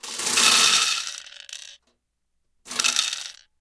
Rhythmic sounds of glass mancala pieces in their metal container.
glass, rhythm